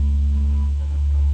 Bass dij
a looped recording of a didgeridoo tone. circa '98